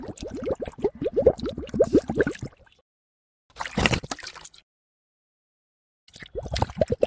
WATER BUBBLES LARGE 01
bubbles, lava, liquid, spurt, thick, water